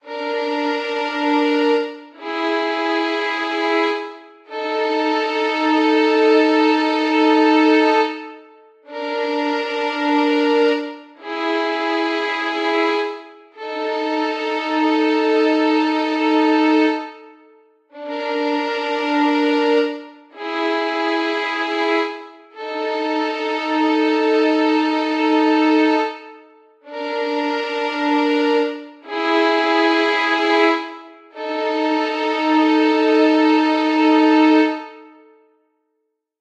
Spooky strings
A bit of a spooky figure I made on violins in GarageBand to something called Victors Crypt. I believe it can be used to something dark and scary. Hope you like it and
Ambiance, Ambience, Ambient, Atmosphere, Cinematic, Dark, Desert, Drone, Eerie, Film, Free, Horrific, Horror, Movie, Mysterious, Mystic, Scary, scifi, Space, Spooky, Wicked